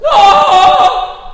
It's a scream